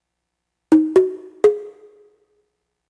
1 short riff pizzicato..